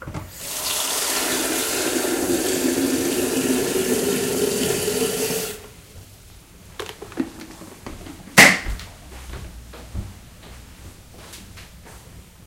fill kettle
Filling a kettle
kettle, kitchen, water